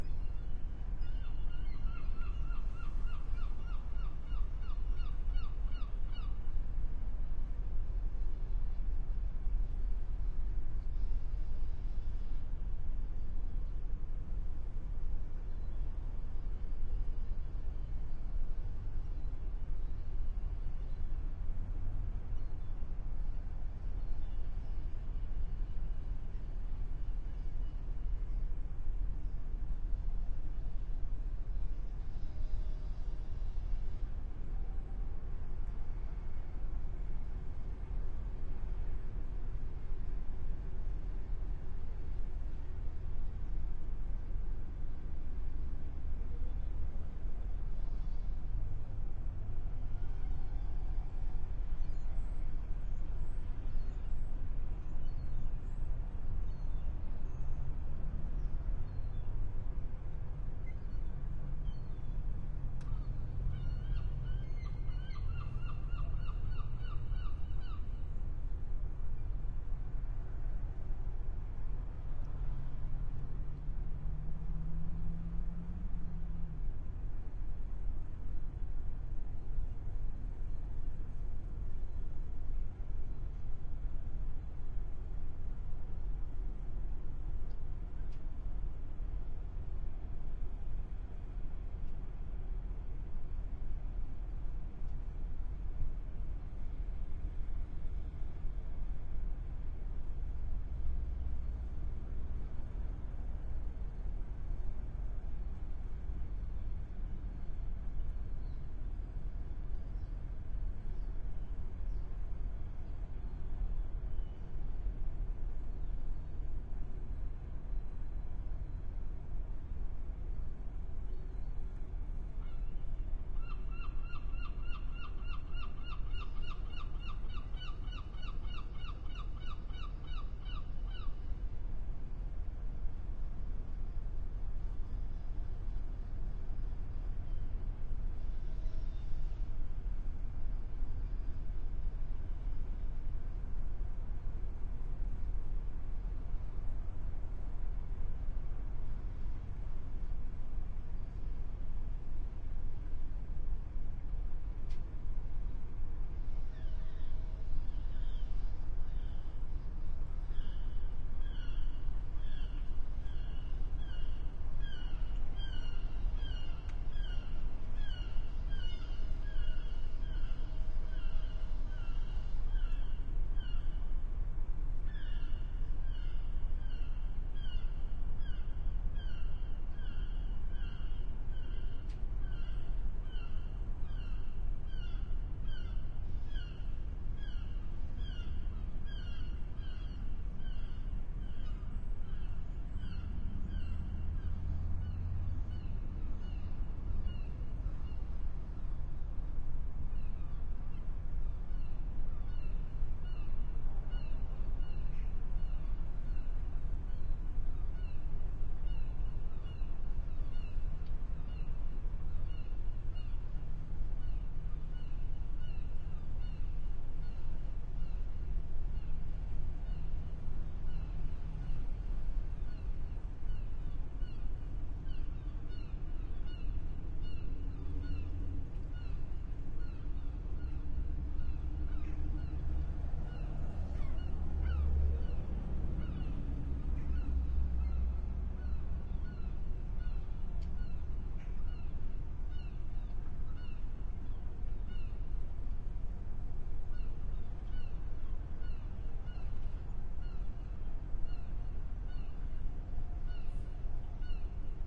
A pretty uneventfull recording of sounds in a harbour in the Netherlands. Sony PCM-D50 and EM172 microphones.
sea, seagulls, ships, netherlands, field-recording